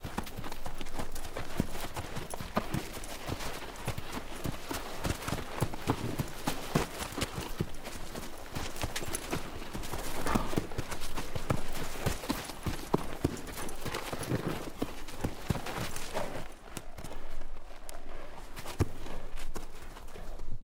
Horse Spinning In Dirt 08
I recorded a trainer spinning their horse on a dirt/sand track.
Trot, Dirt